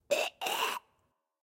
A real zombie moan. Recorded from a live zombie.